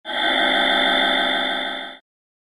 Eerie SFX
Eerie sound, metallic vibration.
creepy, horror, noise